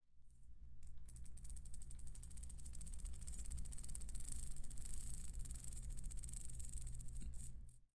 Sound of an helix in operation
airscrew, helix